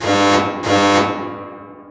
Alarm Loop 1 V3
A sawtooth frequency with some reverb that sounds like an alarm of some kind.
alarm, audacity, computer-generated, synthesized